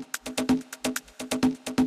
bongo rythm1
128 bpm bongo drumloop
beats, drum-loops, loops, percussion